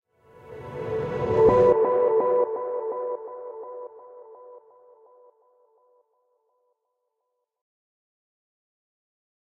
Soft Echo Sweep
A soft transition effect with light delay and reverb processing.
FX, effect, sound-effect, transition